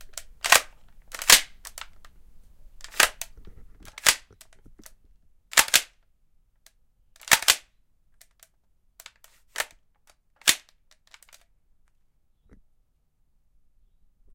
Shotgun cocking
This was recorded with an H6 Zoom recorder at home using a BB gun modelled after a pump action shotgun as I rechambered it at various speeds.
reloading, shotgun, gun, rechamber, cock, cocking, weapon, OWI, firearm, loading